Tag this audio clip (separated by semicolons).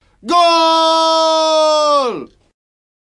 goal; 666moviescreams; football; soccer; cheers; scream